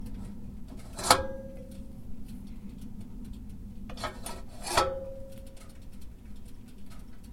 wood stove damper 02
I mess around with the damper on a wood stove as it burns. You can hear it heating up in the background.